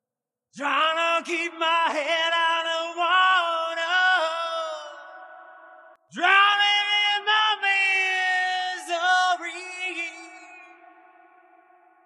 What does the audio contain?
trying to keep head out of water drowing in misrey
Record, singing, Vocal, Voice